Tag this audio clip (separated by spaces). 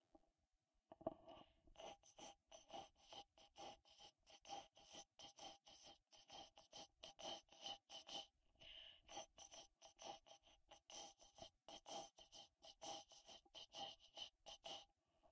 contact drums hat high microphone